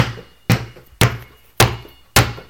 santos balon 2.5Seg 3
ball, Bouncing